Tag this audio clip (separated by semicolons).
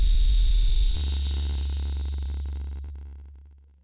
hum; audacity; air; synthetic; airy; whir; machine